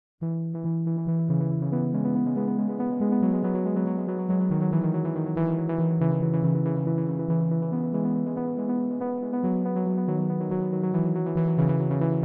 Absolute Synth
A few chords put together using an arppegiator and change in velocity.
electronic, chords, techno, delay, trance, velocity, arppegiator, synth